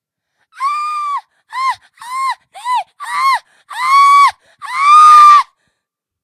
woman Yvonne screams painfully2
Yvonne screams painfully, torture-sounds
cry, scream